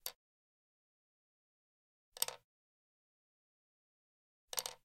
12 - Pencil drop
Czech, Panska, CZ, Pansk